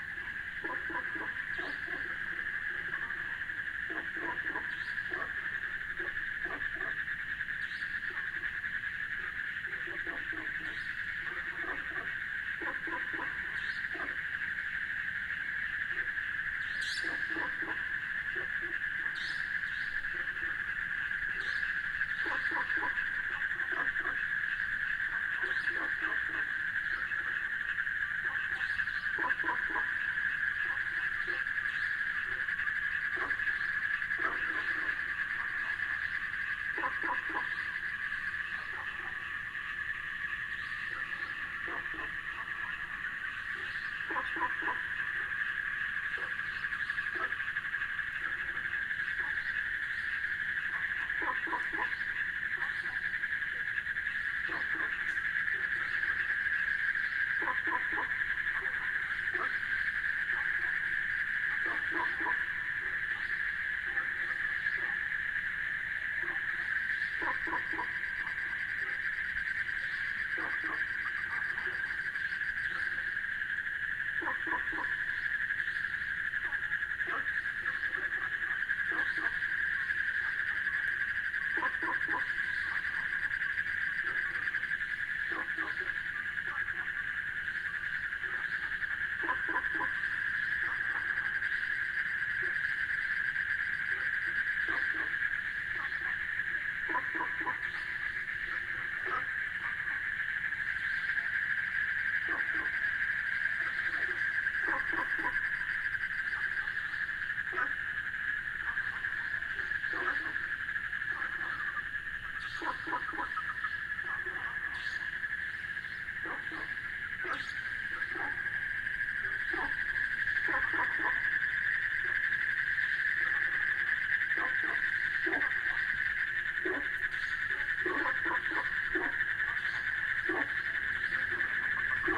AMB Outdoor Water Pond BullFrog Nighthawk I-Shou 2'12''
Recording with my Zoom H4next.
A pond was surrounded by a lot of bullfrog and cricket.
And there's about two nighthawk circled overhead in I-Shou university.
if there's any descriptive mistakes,
please notify me by leaving a comment.
Thanks!
H4n, Midnight, Mountain, Natural, Outdoor, Pond